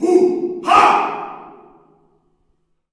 Male yells "Hüh, Ha!"
Male screaming in a reverberant hall.
Recorded with:
Zoom H4n
low, male, scream, uh, vocal, yell